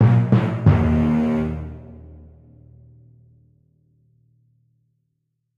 Dun dun dun
Dramatic basses and timpani phrase. Request by bobman86
stab, punch, bass, dun, timpani, strings, drama, chan, dramatic